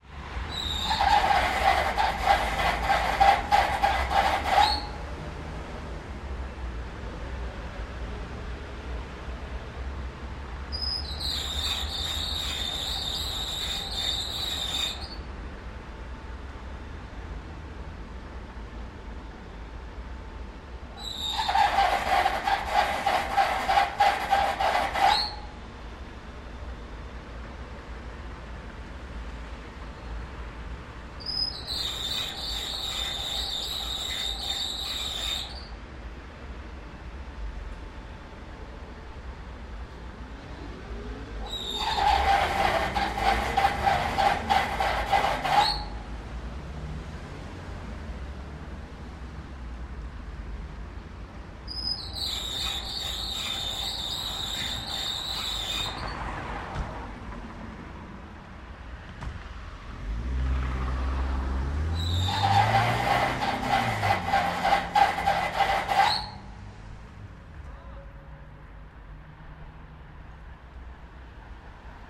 creaking citylight02
used equipment - edirol-r4, sennheiser mkh406t, rycote softie
i recorded this on windy october evening at bratislava, slovakia
rust, billboard, citylight, atmosphere, nigt, creak, cars